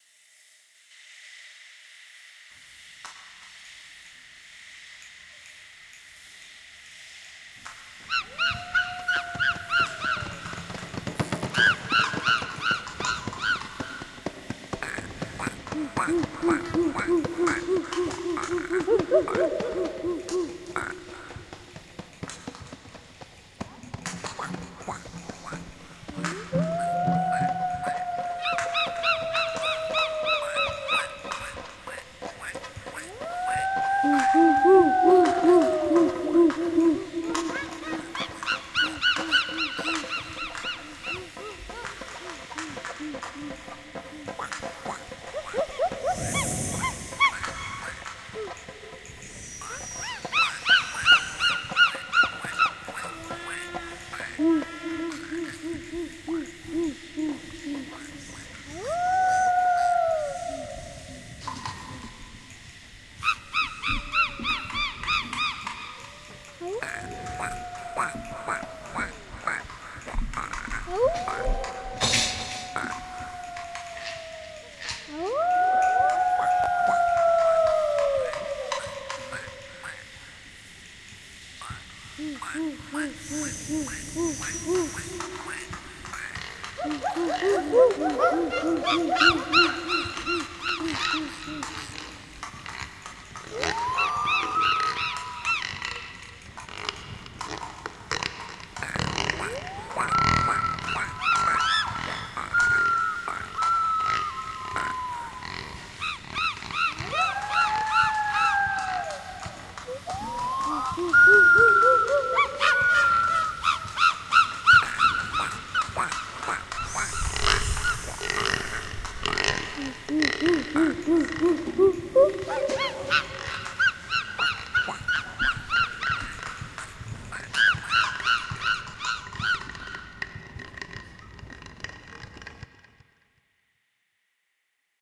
Dschungel Sarmat
Produced during "Kunst & Krempel 2019" at Olympiapark in Munich, Germany. Some sound design for a film.